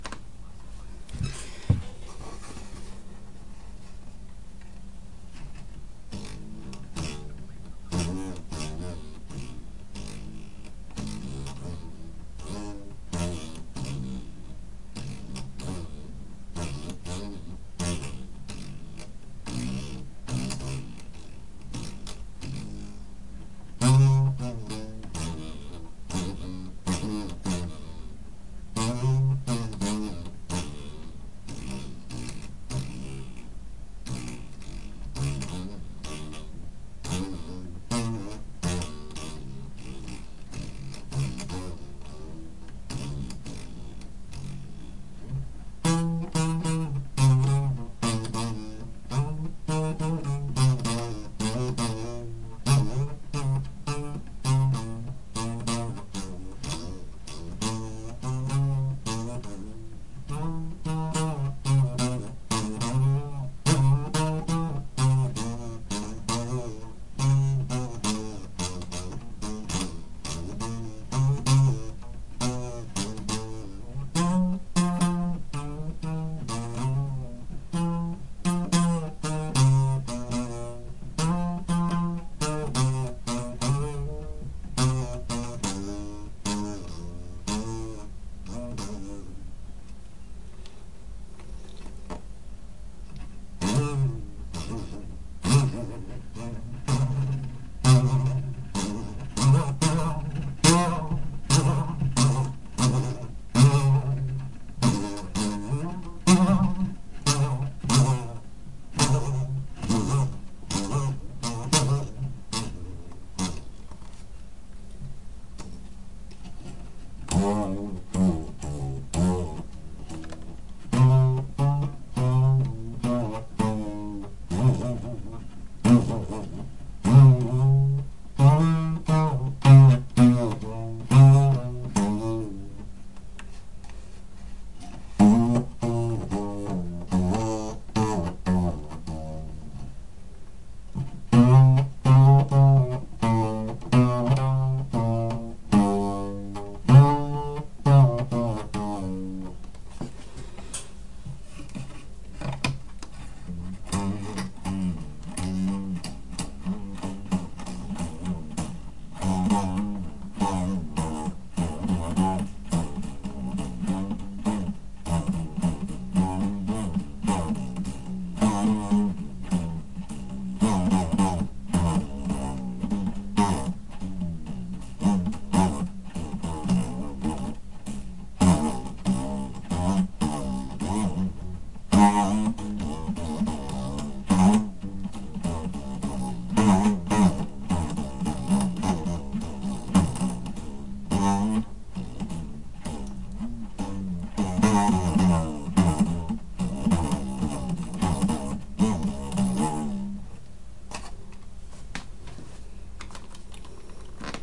homemade wall cubby guitar thingy
In front of my desk in my room is a wood paneled wall with a cubbie. It's about a foot wide, 10 inches from top to bottom and maybe 7 inches deep. I'm just guessing. Around this cubbie is a border of wood. In the bottom right corner under the border I have jammed one end of an elastic string that used to have glitter on it. It's from a Christmas box of chocolates my uncle sent me last year. I stand in front of this cubbie whose bottom is at chin height, (I'm only 5ft1in) so my arms are above my head as I pull this string across the cubbie to the border on the left which acts as my only fret. The string is a few inches longer than the cubbie is wide, but when I pull it it gets longer so my hand is 3/4 along it's length as I pull back and forth across the border to tighten and loosen the string. No matter how hard I pull it never pops loose from it's mooring. The recording starts with me standing up from my chair.
goofy, funky, ethnic, wall-cubbie-bass, folky, annoying-the-neighbors, twang, homemade-instrument, string-bass, literally-house-music, lol, boing-box, boing, one-stringed-instrument, home-made, korean, funny, groovy, sorta-african